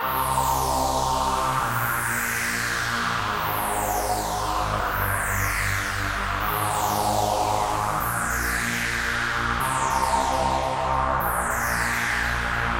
Riser 2 Flicker
Phased pad with distorted feel. 150 bpm
150-bpm, beat, melody, pad, phase, progression, strings, synth, techno, trance